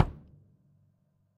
Drumkit using tight, hard plastic brushes.